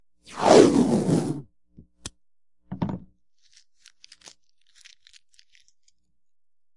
Narrow painters tape (blue, 1" tape) being stretched and then crumpled into a sticky ball.
All samples in this set were recorded on a hollow, injection-molded, plastic table, which periodically adds a hollow thump if the roll of tape is dropped. Noise reduction applied to remove systemic hum, which leaves some artifacts if amplified greatly. Some samples are normalized to -0.5 dB, while others are not.